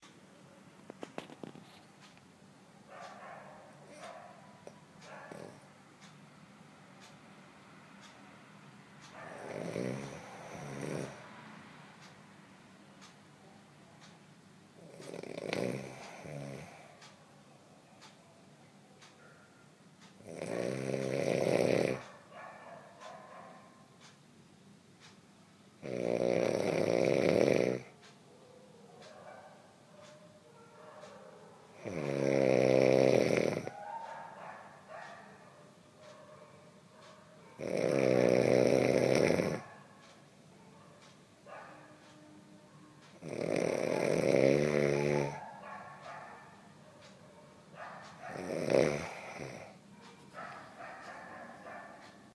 Snoring sound during sleep in an urban area
Rajesh Kothari, Mumbai, India